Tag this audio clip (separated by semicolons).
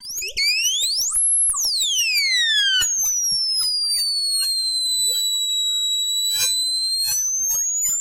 alien; animal; animals; creature; critter; space; synth; synthesized